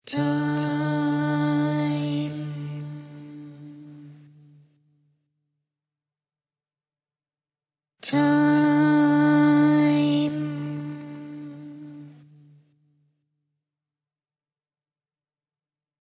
Time voice singing
vocals of the word time. recorded and edited with logic, voice saying the word time.
singing, time, vocals